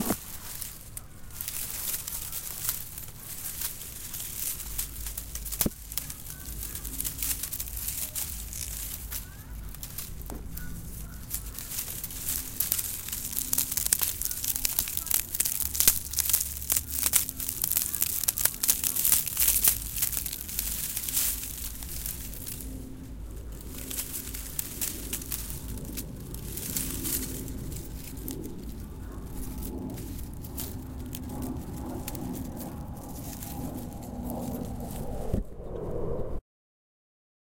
VELD SHRUBS
This is the record of shrubs being moved by hand.